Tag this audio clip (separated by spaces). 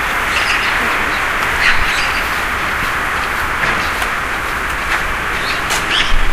birds countryside